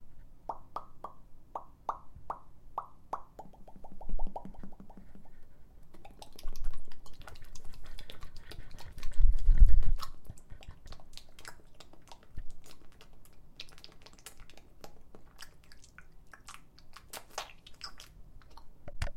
tongue stuff
Several sounds using the tongue. Sounds pretty disgusting.
body, natural, sound, tense